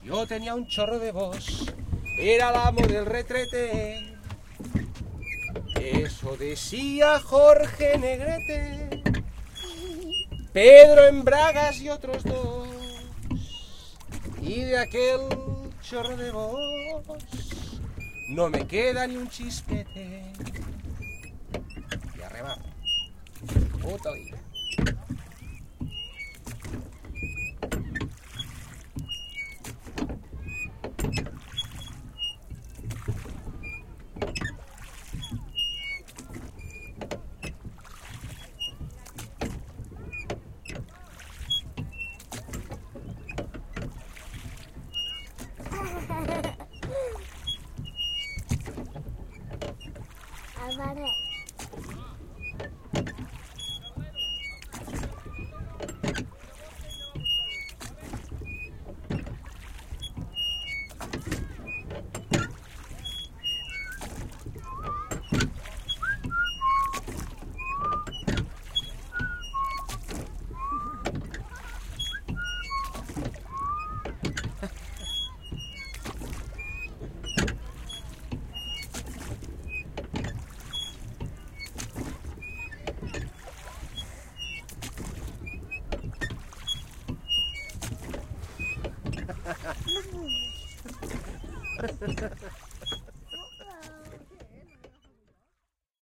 Recording made while rowing in a Little boat with family and friends. M-Audio Microtrack with it's own mic.